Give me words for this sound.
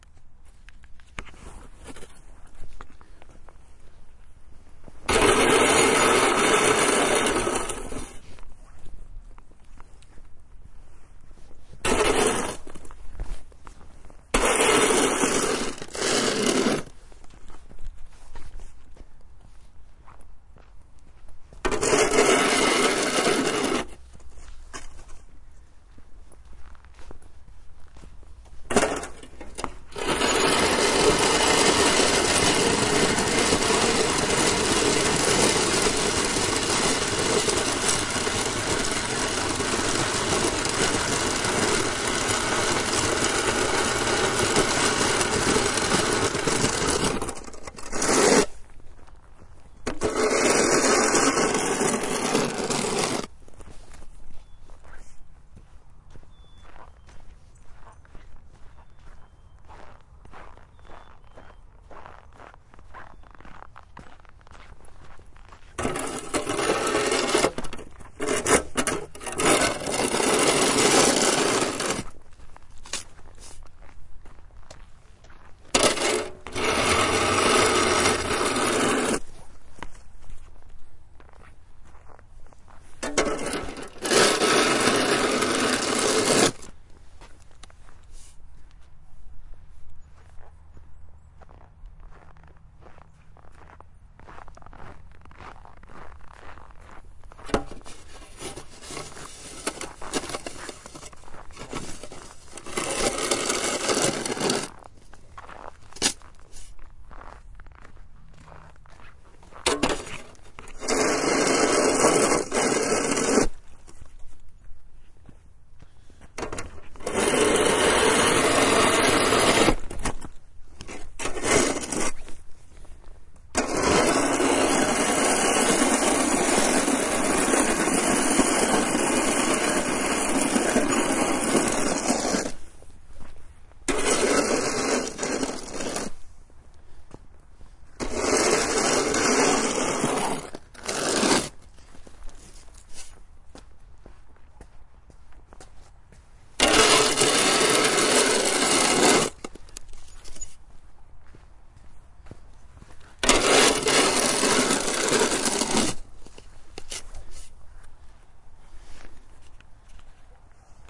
Shoveling Snow
Recorded while clearing about 1 inch snow off the driveway in an early February morning in Minnesota. No wind. Because this is more of what we call "nusiance" snow, there is no real digging. Rather, it's more scraping sounds with extended runs. Could substitute for sound of someone slurping. Or, the sound you would hear in a monster movie (from the monster).
Recorded with Roland R-05, 41000, 16 bit, hard-limited to -3.3 db.